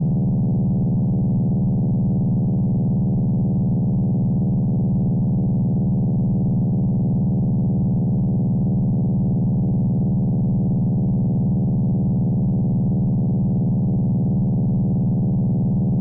My theme is “elements & technology”, this is important because each of these sounds represents Humanities impact on the elements.
All four of my samples have been created on Ableton’s FM synth Operator.
There are zero signal processors used after the initial FM synthesis.
I thought that this is fitting since my theme is specifically about humanities effect on nature. Now for the specific description:
This is a motorboat traveling through WATER from the perspective of being IN the WATER.
Remember: This is completely from an FM synth.
boat, water, synthesized, motorboat, technology, underwater, synth